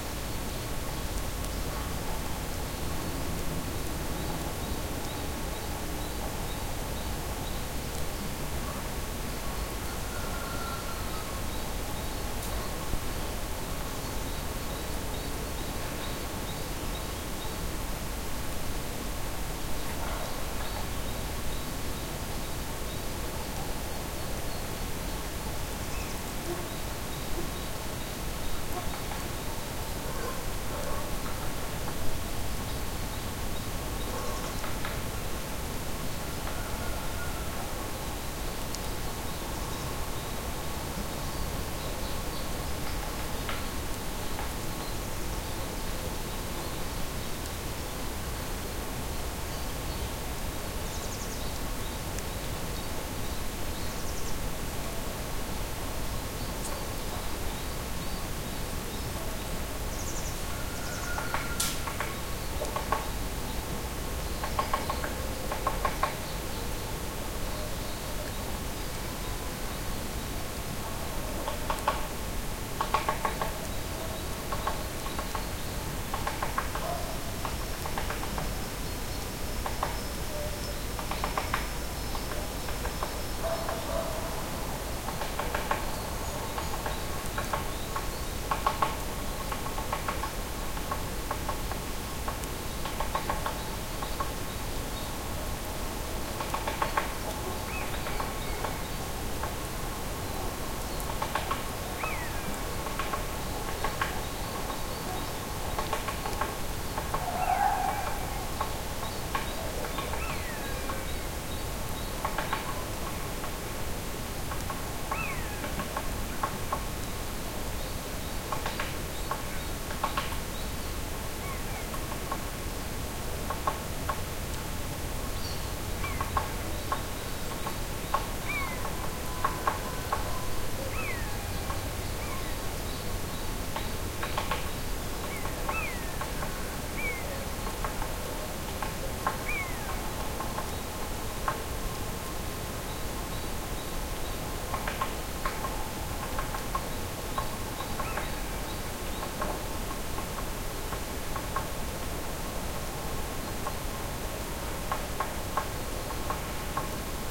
Forest outside the village XY
Ambience of the forest outside the village with woodpecker.
Roland R-26 internal XY microphones.
roland-R-26, dog, R26, field-recording, ambience, woodpecker, forest